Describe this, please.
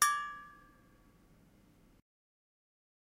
Megabottle - 22 - Audio - Audio 22
Various hits of a stainless steel drinking bottle half filled with water, some clumsier than others.
steel, hit, ring, ting, bottle